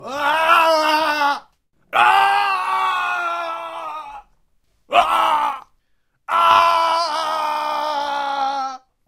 Sebastian Denzer - Scream
Scream of pain, falling or other emotions of a character in a war video game.
anger, animal, cartoon, character, english, game, game-voice, language, scream, shout, speak, violence, violent, vocal, voice